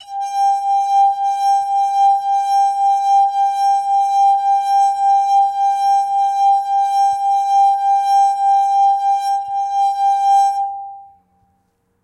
A water glass filled to pitch match a G4